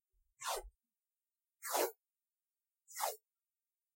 Unrolling a couple bits of scotch tape. I used this as an arbitrary sound effect for some tooltips to pop up.